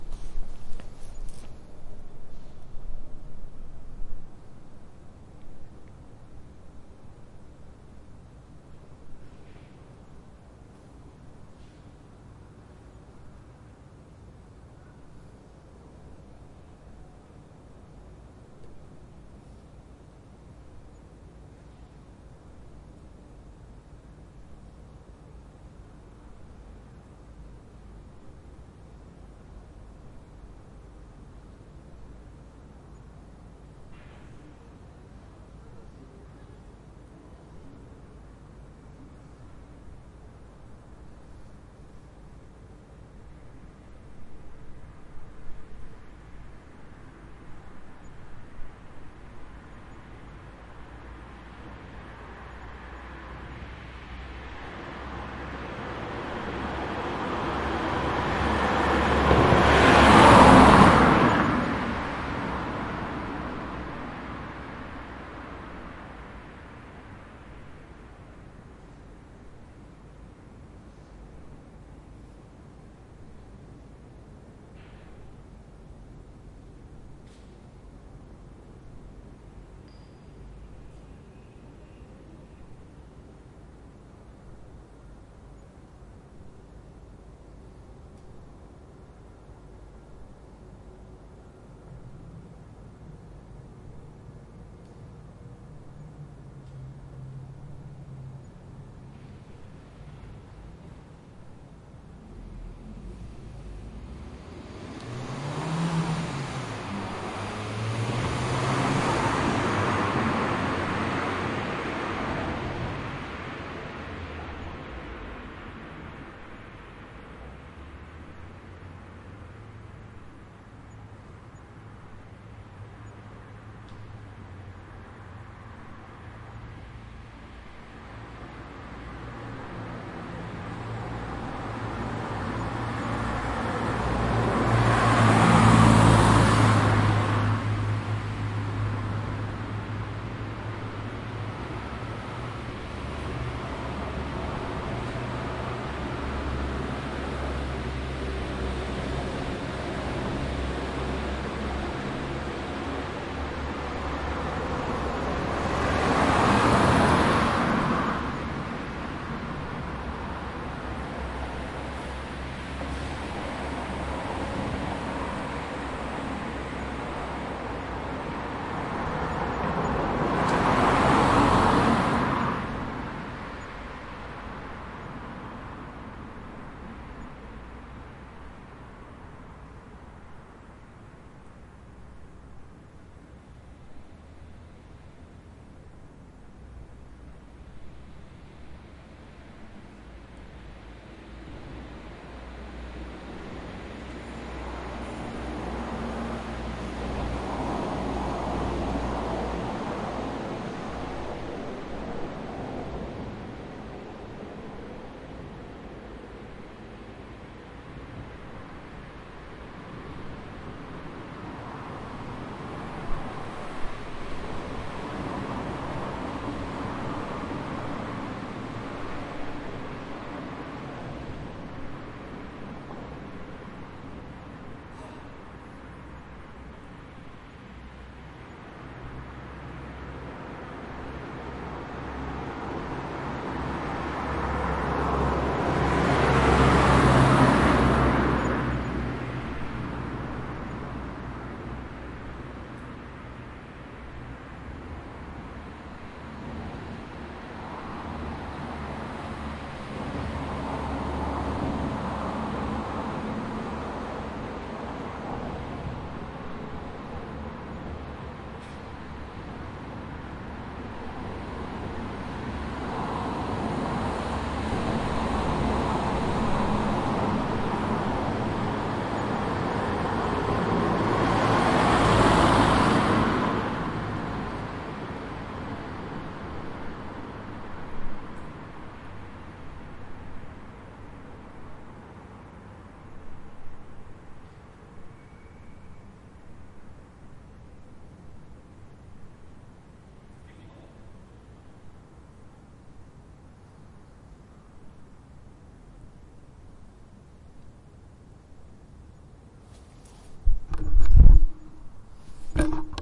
Quiet City Boulvard By Night
City ambience by night. Very quiet but some cars passing by